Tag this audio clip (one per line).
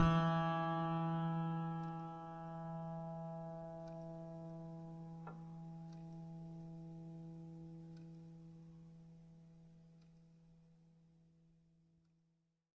fingered
multi
piano
strings